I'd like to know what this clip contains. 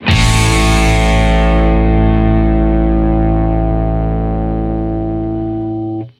Guitar power chord + bass + kick + cymbal hit